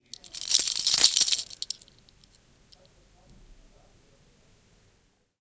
mySound MB Jari
jari, belgium